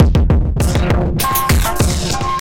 100 Phunkd Drums 02
bit, crushed, digital, dirty, drums, synth